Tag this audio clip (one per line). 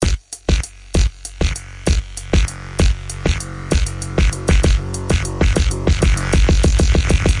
Bass
beat
Dance
Dj-Xin
Drum
Drums
EDM
Electro-funk
House
loop
Minimal
Sample
swing
Synth
Techno
Trippy
Xin